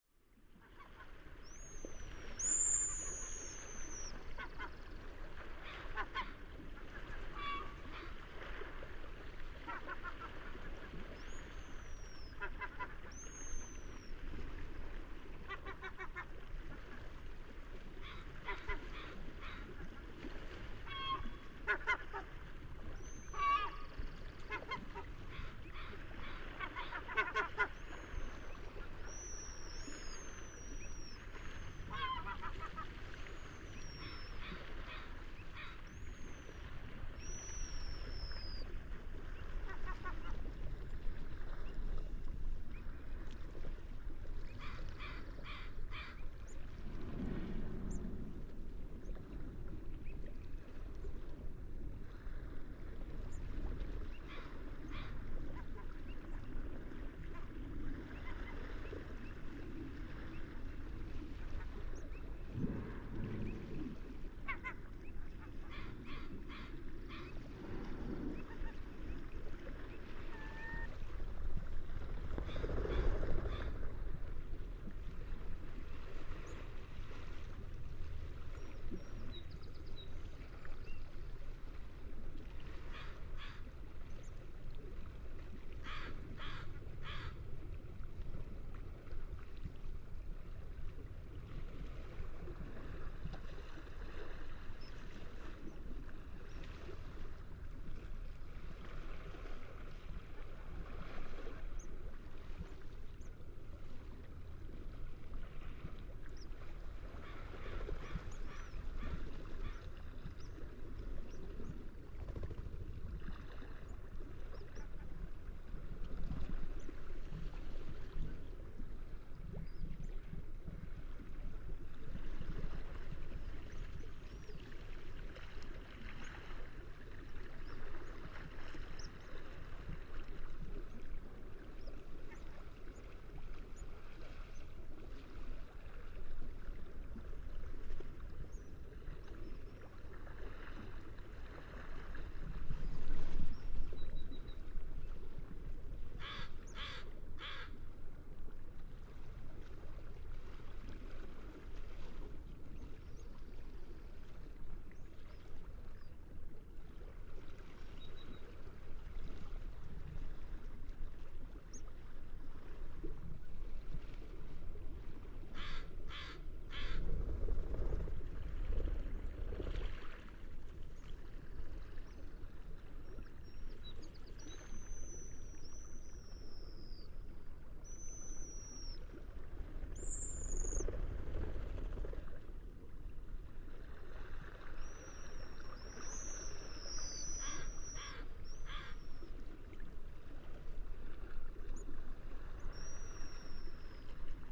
Seagulls by the sea
by the Baltic sea, Tallin, Estonia. Sea waves and seagulls.